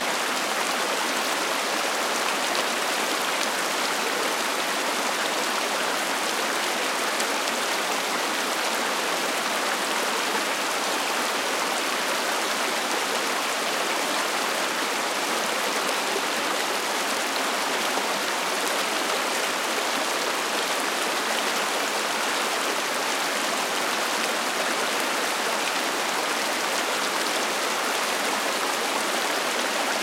Creek in Glacier Park, Montana, USA
water, nature, field-recording